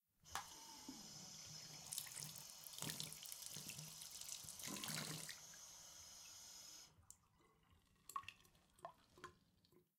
Lavamanos agua
Agua, llave, manos